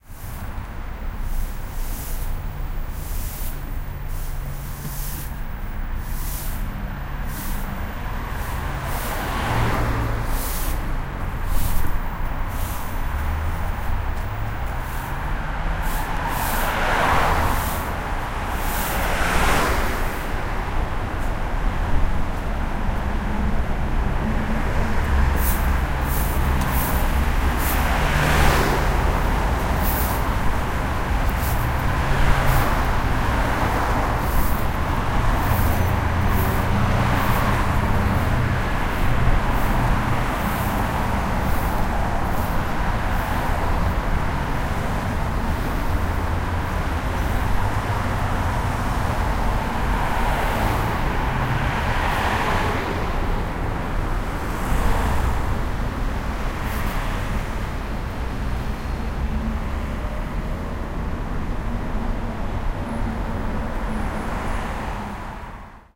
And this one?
Sweeper, and traffic very close
20120116
field-recording, korea, seoul, sweeper, traffic